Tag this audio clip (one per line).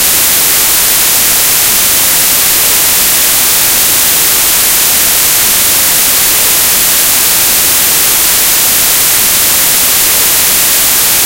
noise
Static
televison
tv